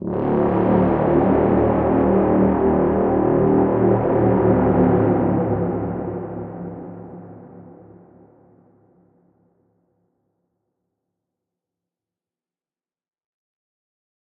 War of the worlds Horn 3
Had a go at making some of the Tripod sounds from war of the worlds , Turned out orite, will be uploading a tutorial soon.